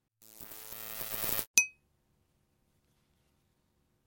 By request. Sound of lightbulb dying. Used a frequency modulation to approximate the sound of filament burning up. Second sound is a pencil eraser tapped near the base of a 40W light bulb.
lightbulb, glass, spark, ring